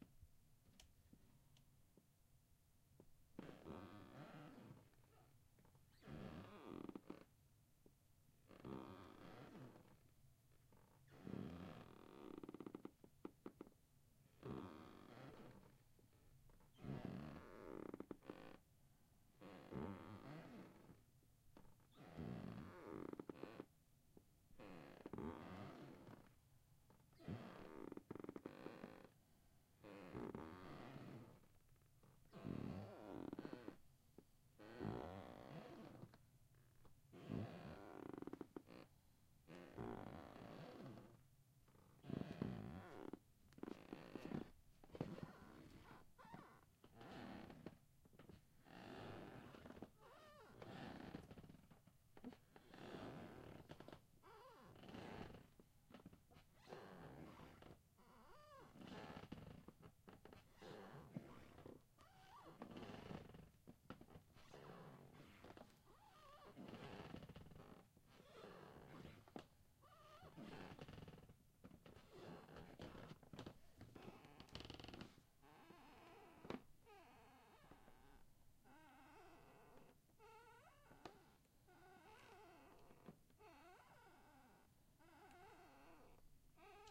squeaky floor & steps h4n & rode mic